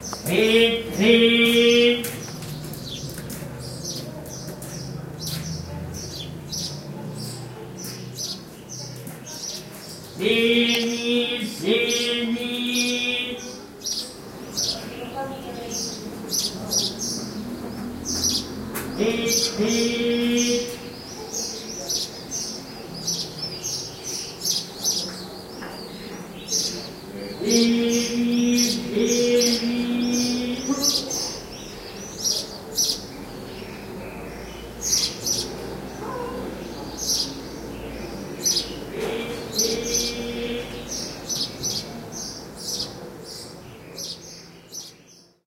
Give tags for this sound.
herring; Marrakesh; Medina; Salesman; seller

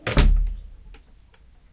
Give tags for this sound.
345; Car; door; Volvo

